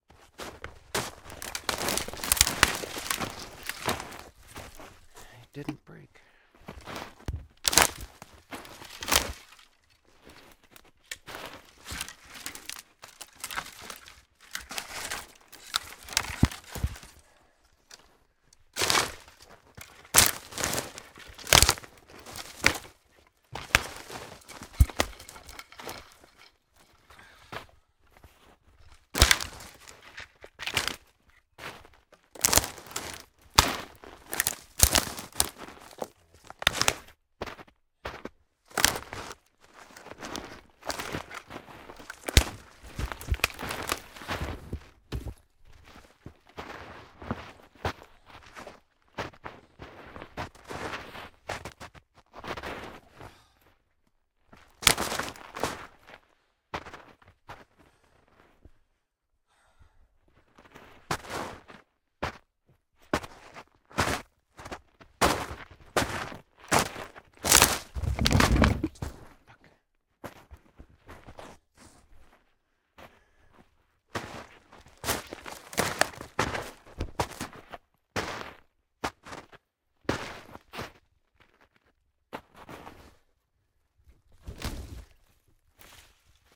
footsteps heavy rubber boots forest breaking branches1
boots, branches, breaking, footsteps, forest, heavy, rubber